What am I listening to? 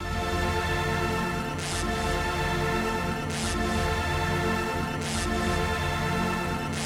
140 bpm sound fx 5
140 bpm dubstep sound fx
140-bpm, dubstep, sound-fx